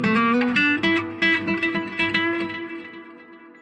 Created on a classical guitar, digitally remastered to sound more "electric." Enjoy!